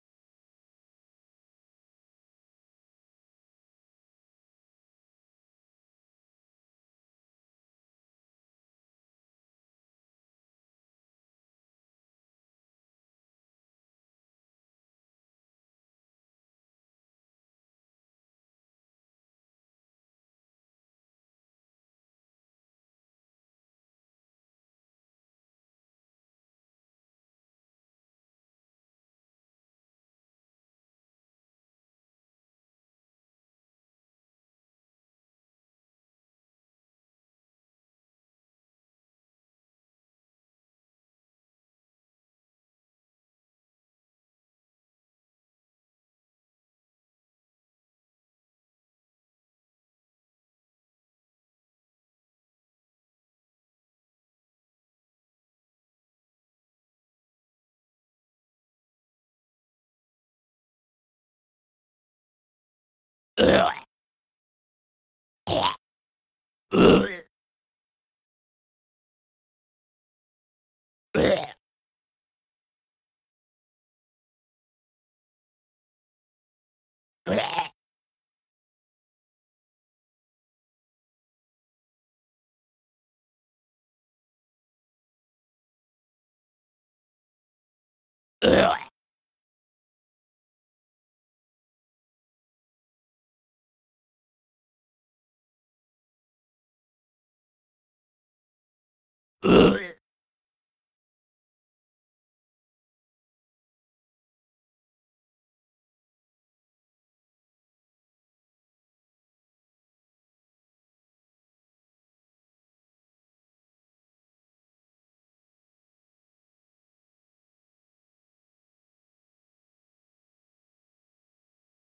Solo Zombie 9
Single groaning zombie. Syncs at 08.24.14.
dead-season, groan, horror, solo, undead, voice